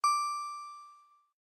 Vintage Alert Notification 1 3
Synthetized using a vintage Yamaha PSR-36 keyboard.
Processed in DAW with various effects and sound design techniques.
Alert, Short, Reward, Muffled, Sound, Keyboard, Synth, Digital, Yamaha, Bell, Design, High, PSR36, Synthethizer, Error, Minimal, Low, Long, Notification, Off, On, Vintage, Percussive